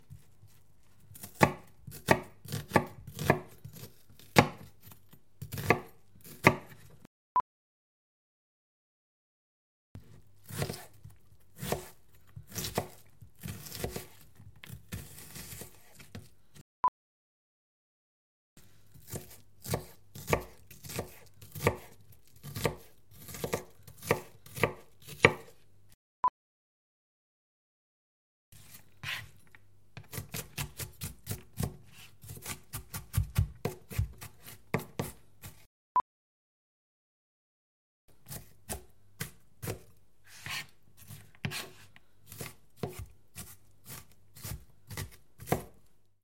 Chopping onion
catering, empty, field-recording, kitchen, sink, stereo, water